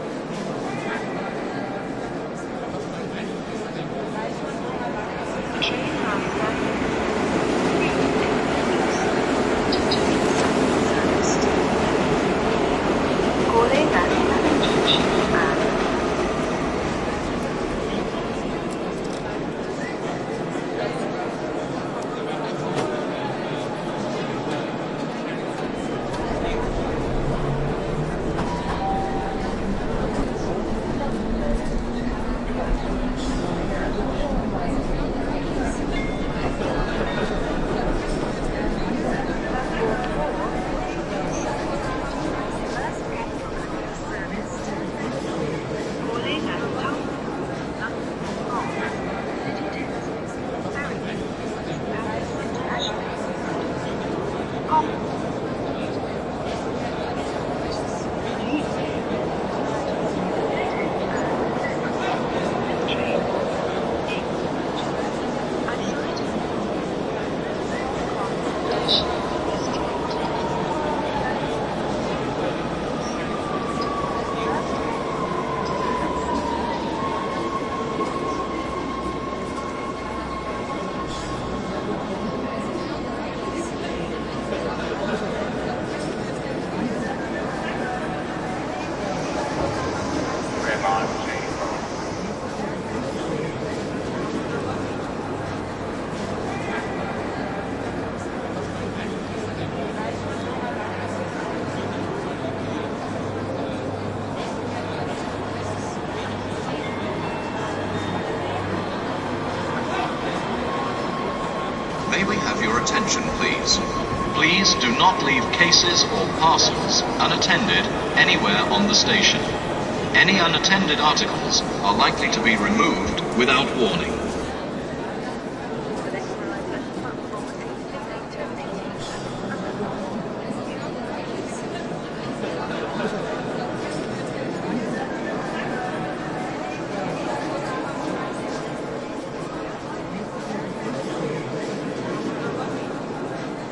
A stereo mix of a busy train station. The trains come and go, there are many people and several announcements.